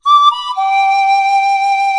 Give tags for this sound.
Tropical; Asset

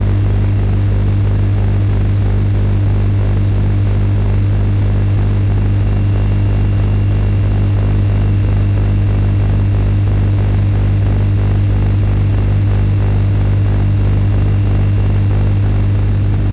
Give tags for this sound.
industrial; machine; motor; pump

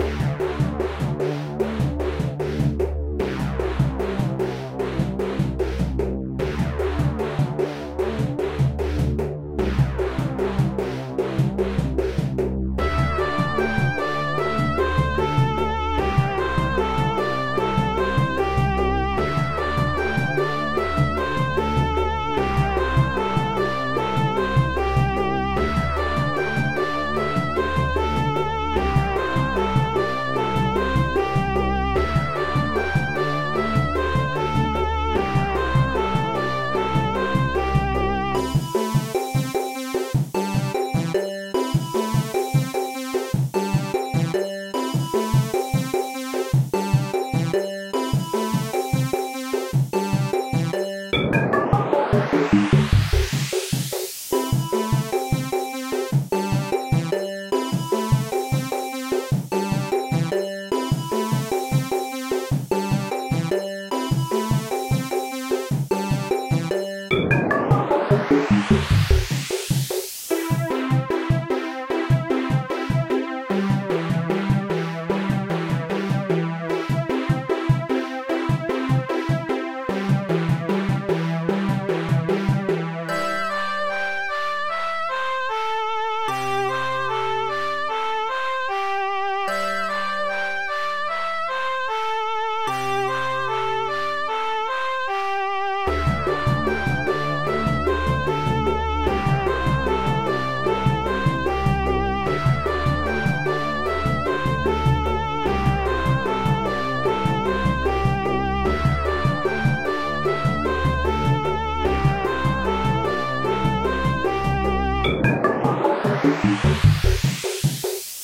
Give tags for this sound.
ambience; ambient; atmosphere; background; cartoon; electronic; funny; loop; melody; music; relaxing; soundtrack; space; synth; trans; videogame

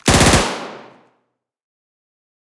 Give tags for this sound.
war; projectile; ar15; fire; warfare; firing; gun; bullet; army; burst; military; explosive; m16; pistol; weapon; attack; rifle; shooting; shot

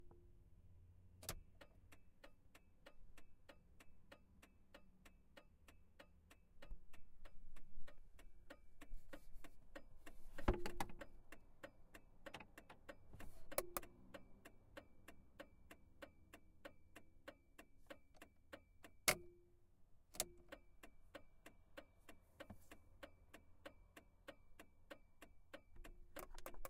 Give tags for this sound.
clicking; sound; click; turn-signal; driving; foley

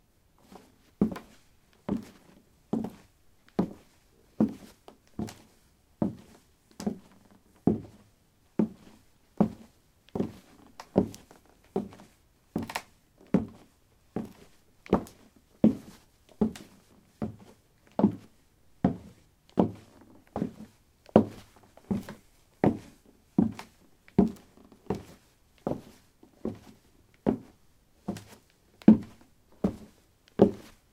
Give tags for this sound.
step; steps; footsteps; walk; walking; footstep

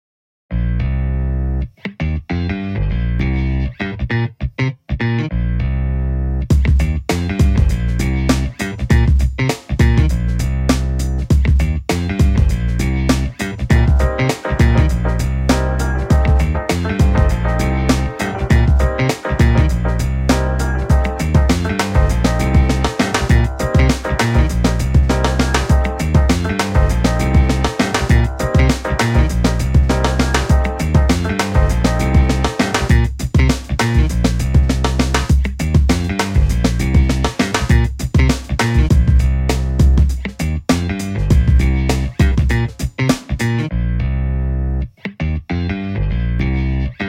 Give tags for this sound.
bass; chord; electric; guitar; guitar-beat

